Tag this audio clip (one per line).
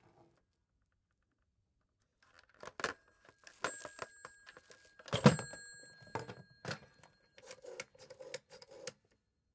rotary
phone
placing
old